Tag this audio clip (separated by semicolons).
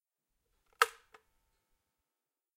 electric-kettle; press; click; kettle; button; switch